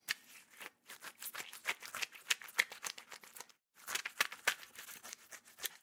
A really gross, uncomfortable sound made by punching and basically massaging a splattered pineapple.